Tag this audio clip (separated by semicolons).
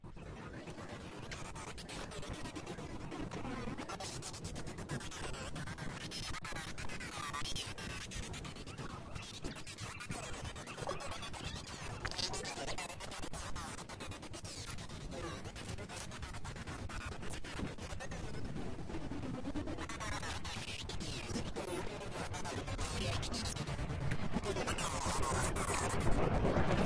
useless; noise; mangled